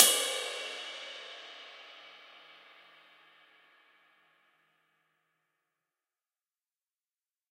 Skiba2025Bell
A custom-made ride cymbal created by master cymbal smith Mike Skiba. This one measures 20.25 inches. Recorded with stereo PZM mics. The bow and wash samples are meant to be layered together to create different velocity strikes.
cymbal, drums, stereo